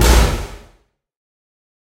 Small Explosion
bang, boom, explosion, explosive